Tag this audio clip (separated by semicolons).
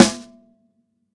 drum; snare; funk